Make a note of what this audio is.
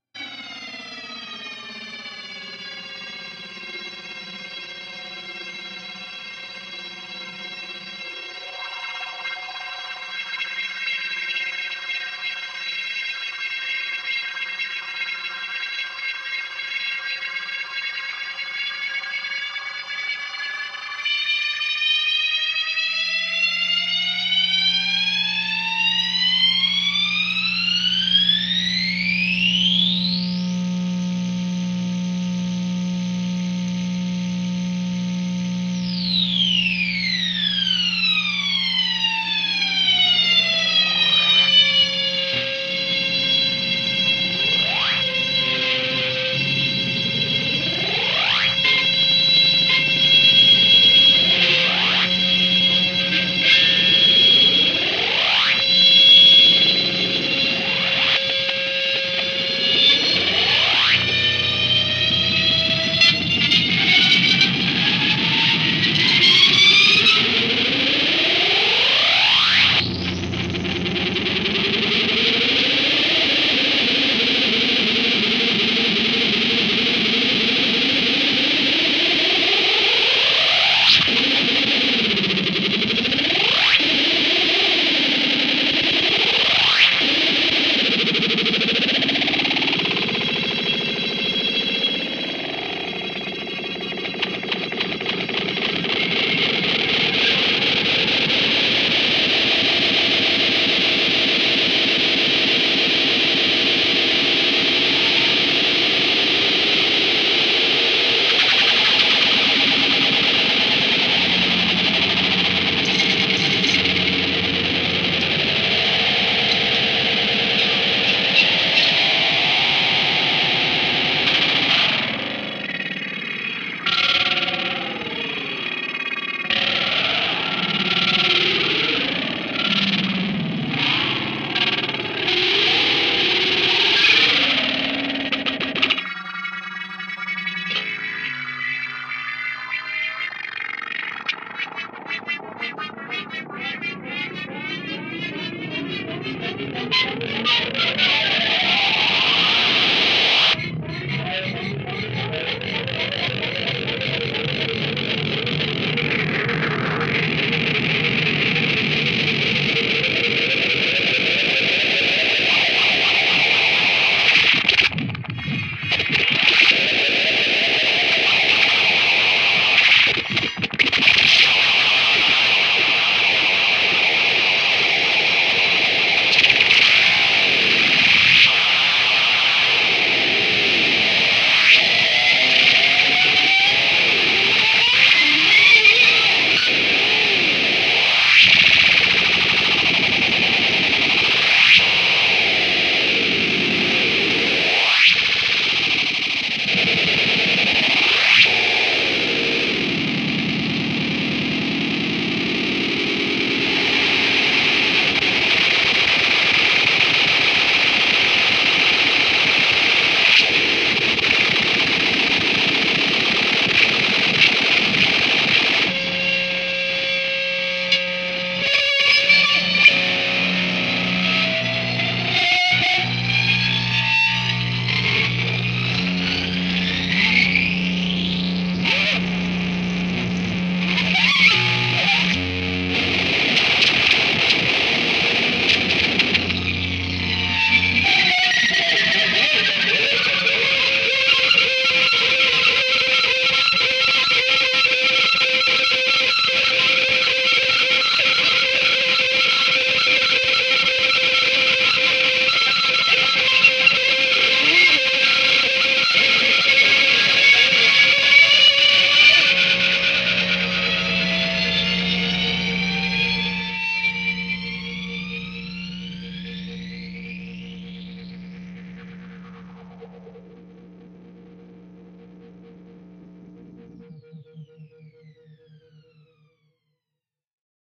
Abstract Guitar SFX 002
noise, effects